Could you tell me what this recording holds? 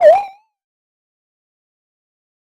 Attack Zound-130
Similar to "Attack Zound-118", but really short. This sound was created using the Waldorf Attack VSTi within Cubase SX.
electronic, soundeffect